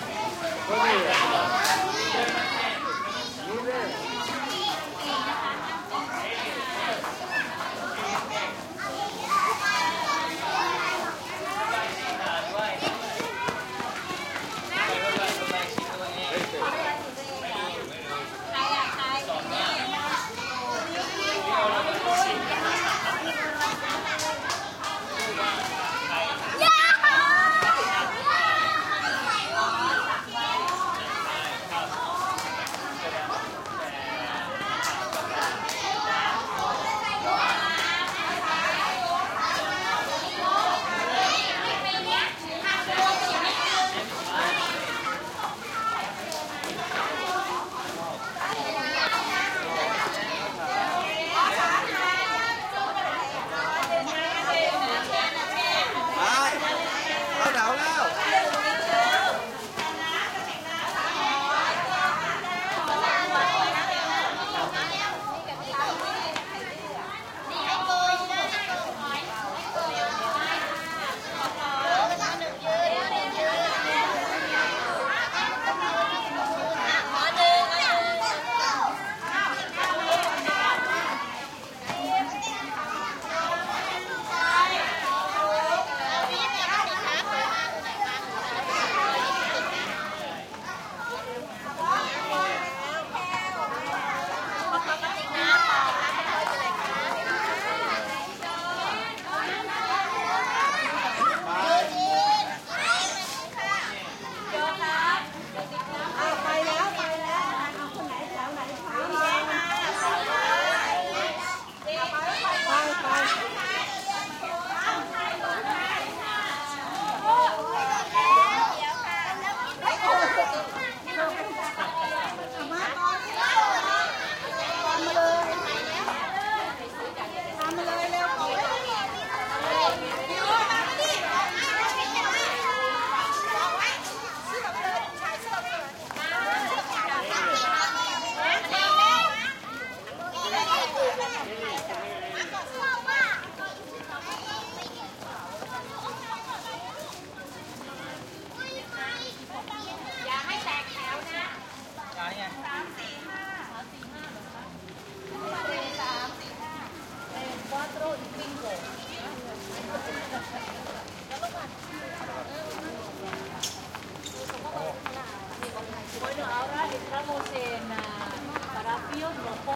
Thailand Bangkok crowd ext schoolchildren schoolyard or quiet street
Bangkok, crowd, ext, field, quiet, recording, schoolchildren, schoolyard, street, Thailand